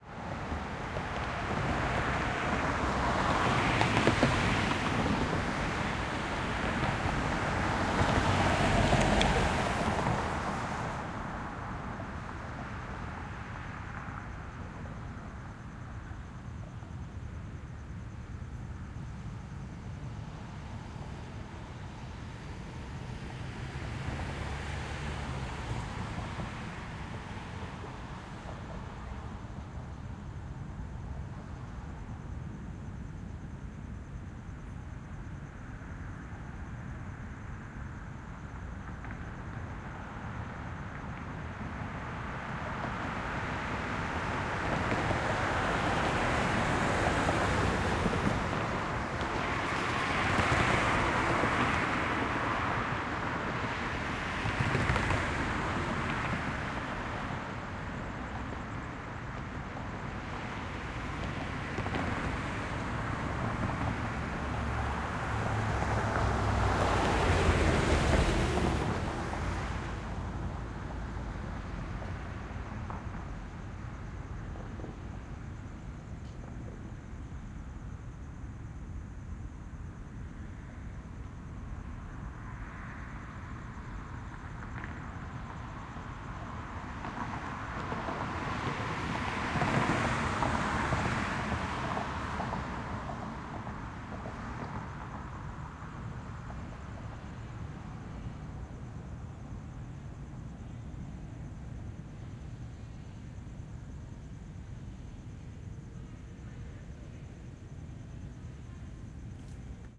highway night 2
recording of Los Angeles city street at night, some crickets in BG, cars in either direction, dry road, XY on H6N
highway, cars, night, traffic